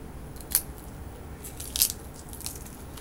Bone Break 2a
Bone breaking 2a: Bone breaking, cracking and splitting.
Made with celery, so no one was harmed.
crack, effect, flesh, gore, horror, human, people, snap